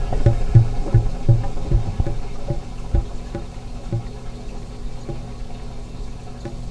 WashingMachine-drain&glug
This is a mono recording of a washing machine as it's draining water and the pipe is making a "glugging" sound. This was recorded with a Fostex FR2-LE with an AT897 mic.
drain, glug, laundry, washing-machine, water